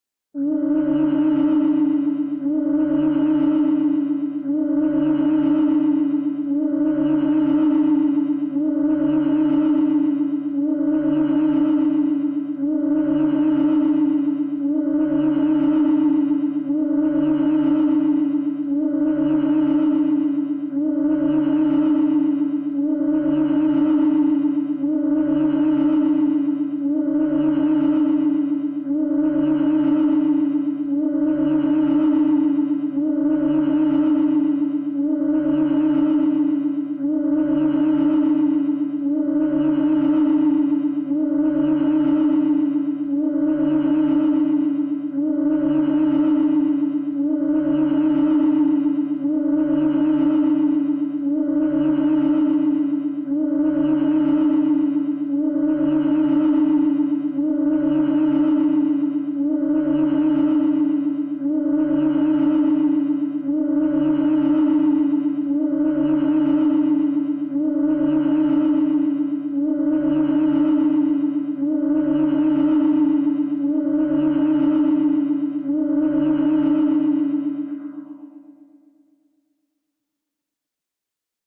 onboard alien craft
on-board a lo fi spaceship from the 50s.